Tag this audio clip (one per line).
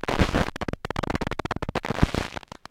noise
glitch